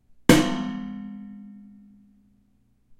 BC metallic ring
a metallic ring
impact, metal, ring